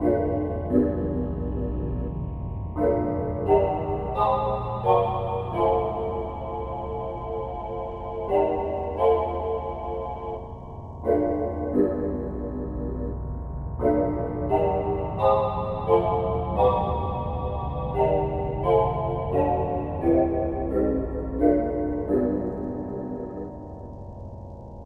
horror01 loop
A simple melody loop to create freaky Ambient.